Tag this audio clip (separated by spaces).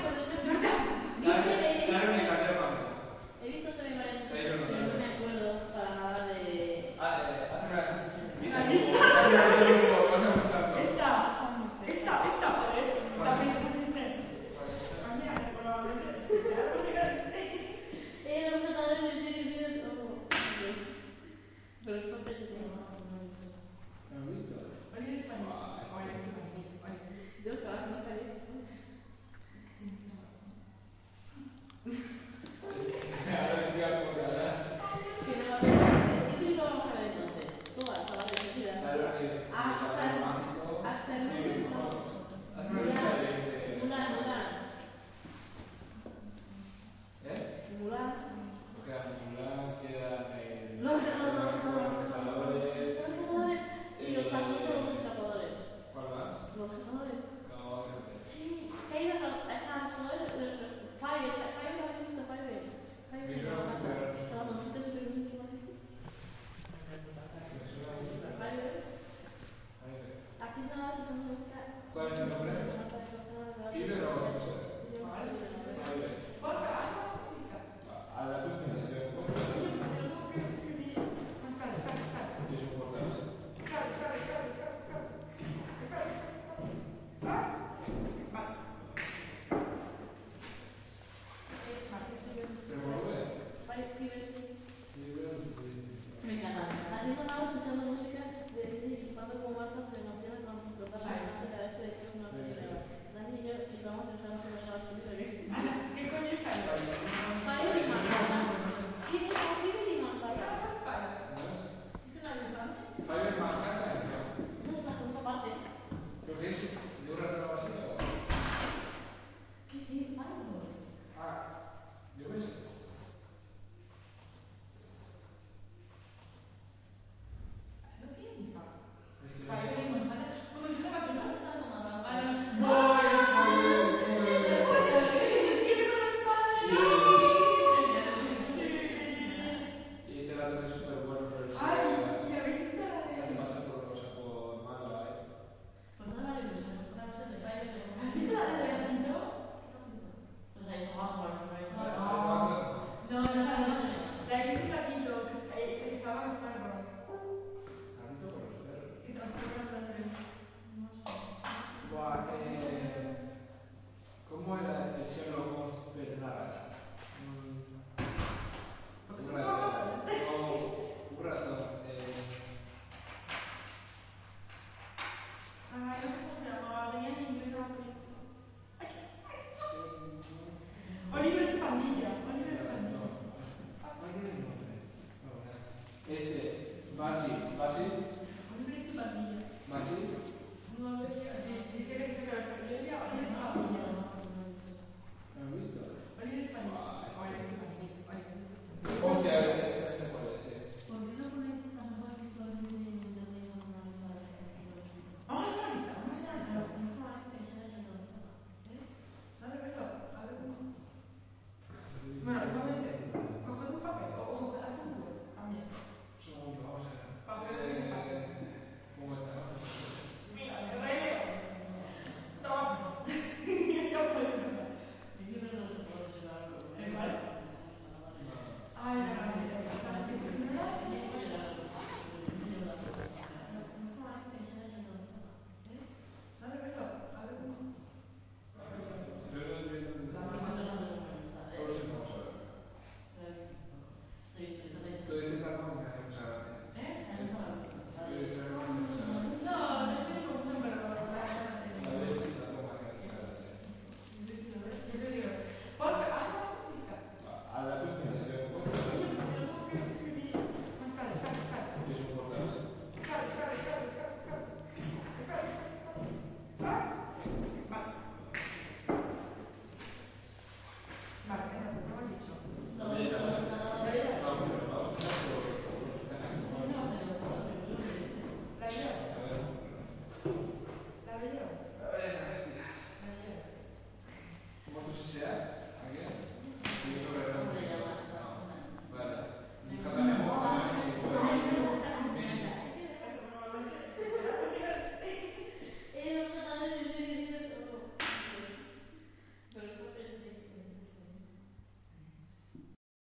chatting students